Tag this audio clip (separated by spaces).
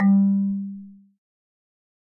instrument marimba percussion wood